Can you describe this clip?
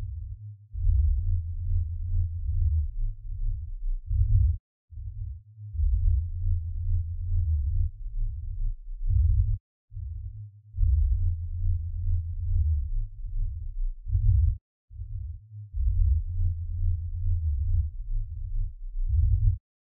I wanted to create some synth tracks based on ancient geometry patterns. I found numerous images of ancient patterns and cropped into linear strips to try and digitally create the sound of the culture that created them. I set the range of the frequencies based on intervals of 432 hz which is apparently some mystical frequency or some other new age mumbo jumbo. The "Greek Key" patterns in my opinion where the best for this experiment so there are a bunch of them at all different frequencies and tempos.